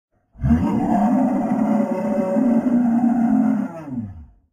A sound of a monster or demon roaring at the top of its lungs.